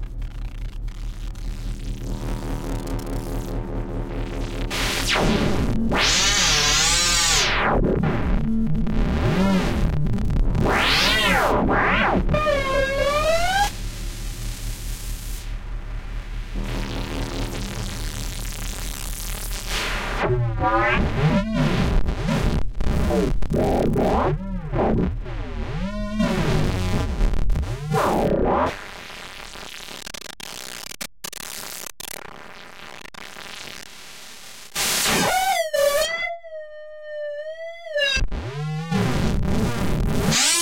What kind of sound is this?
Cloudlab-200t-V1.2 for Reaktor-6 is a software emulation of the Buchla-200-and-200e-modular-system.
Emulation, 200t, That, 2, Native, Reaktor, Cloudlab, Instruments, Runs, 6, Buchla, V1, Software